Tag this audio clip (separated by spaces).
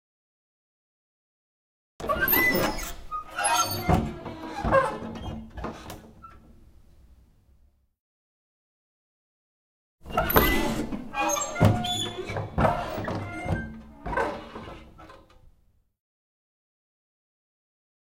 closing cowboy door field-recording opening saloon sheriff slam west western